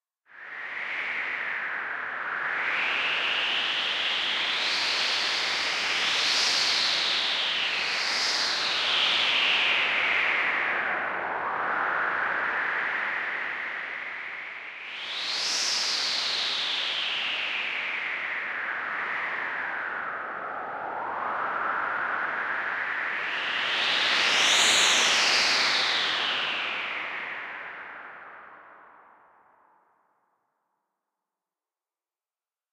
Sound created for the Earth+Wind+Fire+Water contest
Recorded from a dusty synth sound, the Juno 106 (patch B58).
I have played a little melody inspired by a song from a band very related to the contest :)
The sound was then processed by a high end reverb.
The result is a synthetic sound of wind.
Wind = a melody in a vintage synth